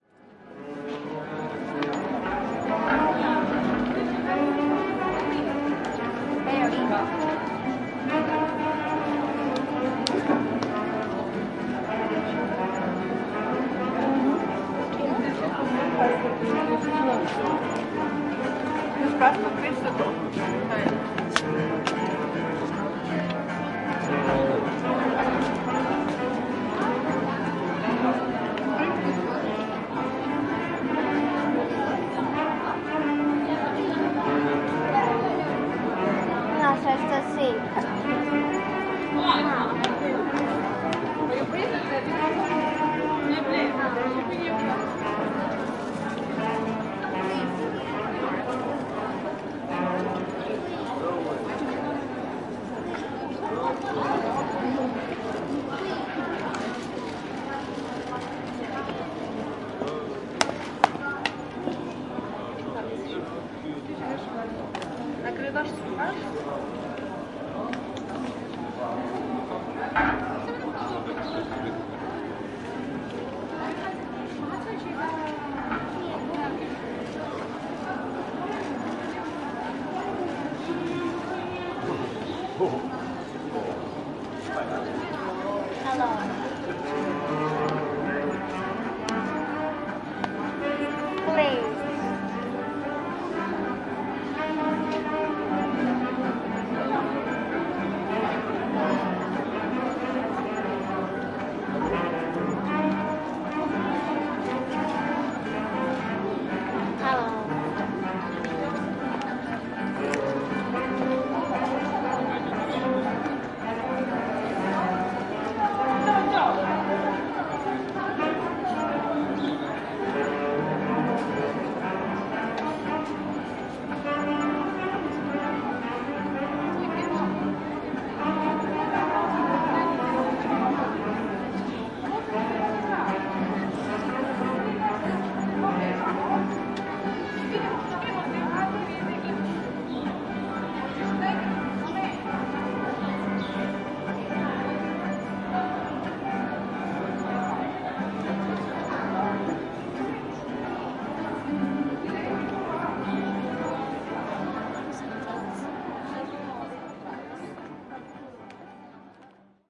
in front of crkva st nikole in kotor 08.05.16
08.05.2016: in front of Crva St Nikole in Kotor in Montenegro. Ambience of 1 street (Istoc-Zapad). Recorder marantz pmd661 mkii + shure vp88 (no processing).